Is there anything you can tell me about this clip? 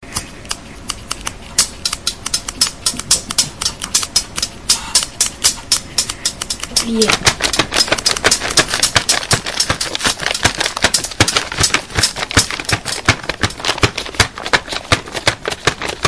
TCR soundscape MFR nolan-johann
French students from La Roche des Gr&es; school, Messac used MySounds to create this composition.
France, messac, soundscape